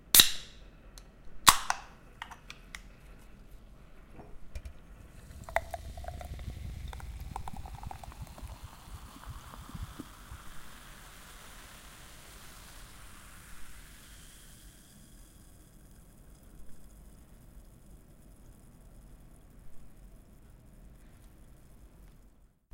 open a can of coke and dropping it onto a glass.
campus-upf, coke